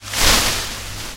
HOT SIGNAL.Leaf sounds I recorded with an AKG c3000. With background noise, but not really noticeable when played at lower levels.When soft (try that), the sounds are pretty subtle.